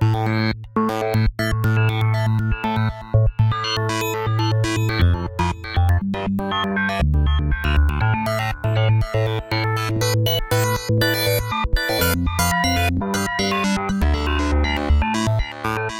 This loop has been created using program garageband 3 using theSynthesizer Stepper of garageband 3
stepper, synt